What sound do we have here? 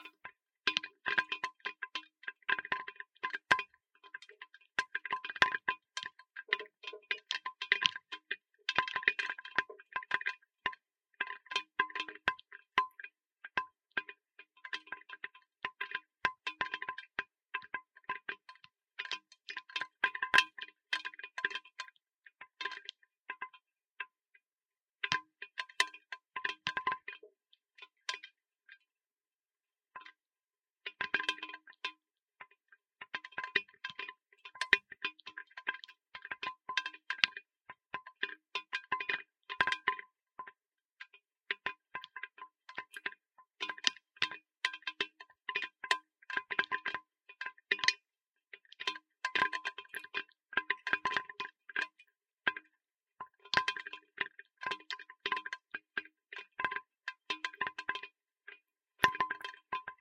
Rain flower bowl [Processed]
Recorded with a Hydrophone and a MixPre-6.
Heavily denoised in RX.
hydrophone, rain, recording